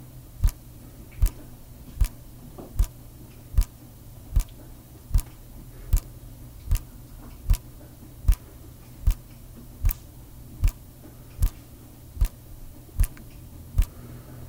Shower Water Running Drip Toilet